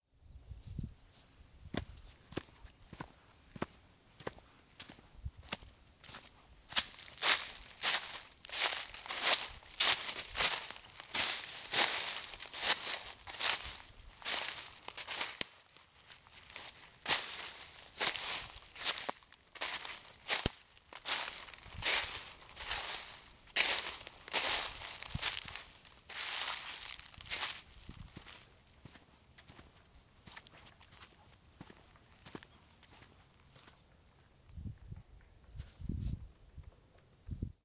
Walking through forest
Sounds of walking in the forest in Poland. Walking on the path, on the leaves (with some sticks breaking) and in some mud.
wood, footsteps, walk, mud